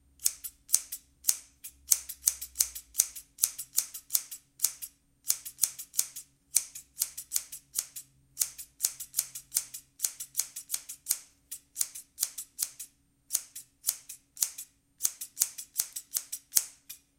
Cutting the air opening and closing a pair of hair dresser´s scissors. Vivanco EM34 Marantz PMD 671.
environmental-sounds-research, clapping, snapping